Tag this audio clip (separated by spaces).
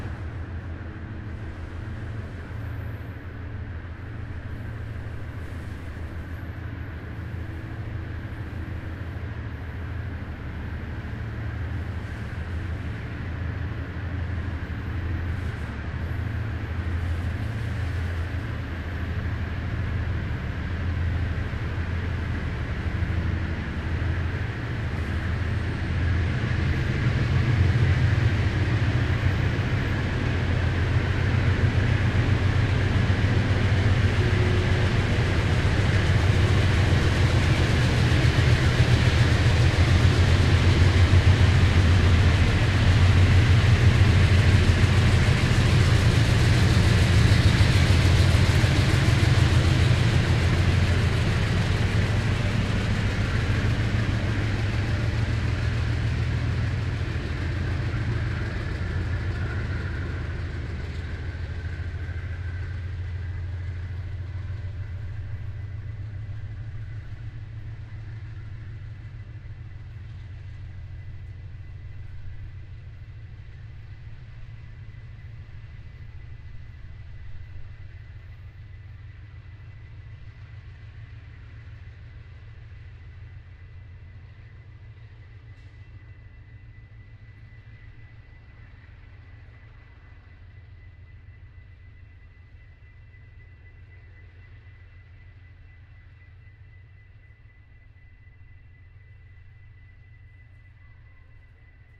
estao trem rudo